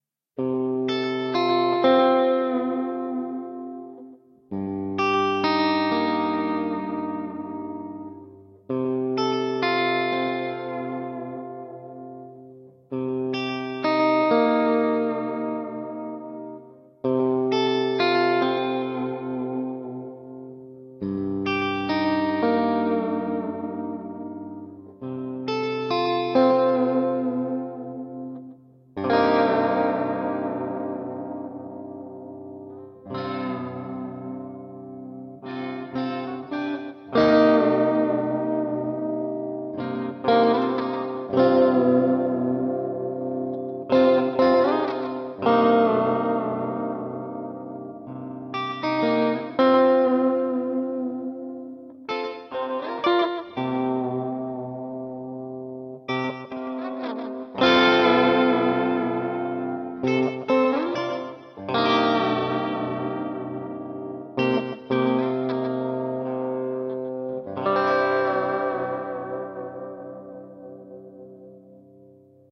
Floyd Filtertron CG stuff
playing around with the C and G chords on a nice clean, heavy reverb and delay setting. Moody and relaxing